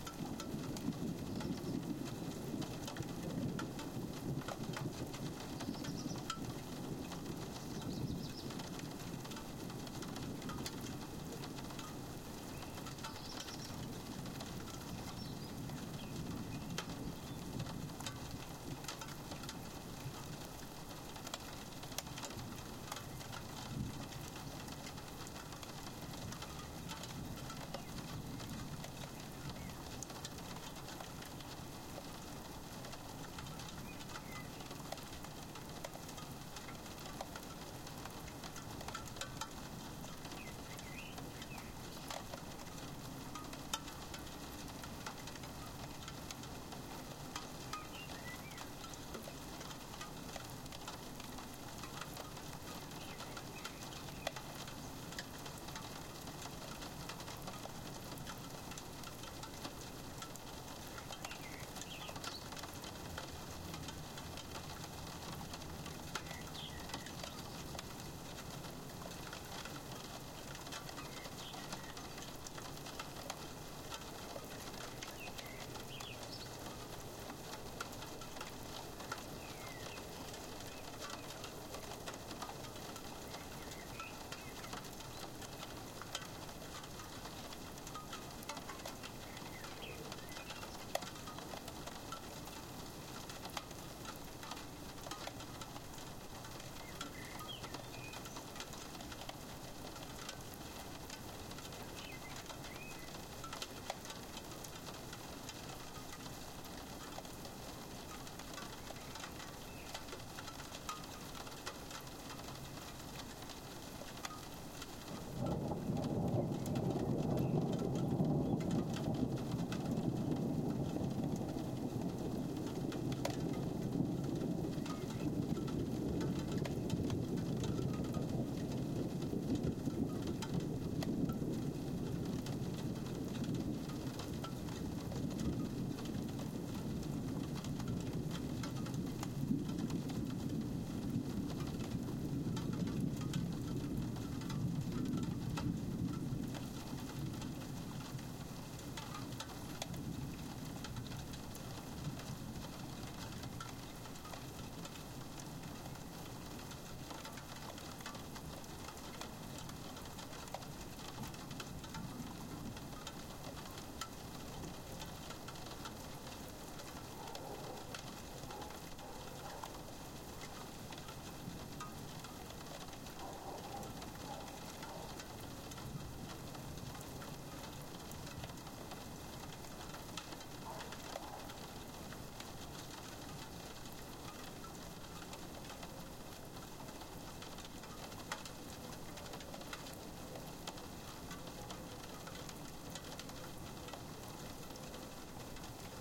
Soft rain on an open roof window recorded from the inside. There's a suburban hum and ambiance with a few birds chatting and some thunder in the distance.
Recorded in stereo with Tascam DR-100 internal mics.
Subtle compression and some eq was applied.